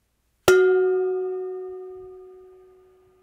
hit a pan